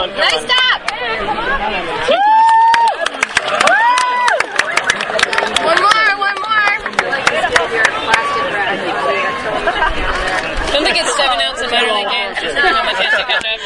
Clapping and some cheers.
cheers, clapping